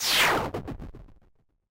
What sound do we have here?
Wave Of Noise
This is a "wave" of noise.
Useful for...noise.
glitch, sound, wave, unaudible, effect, noise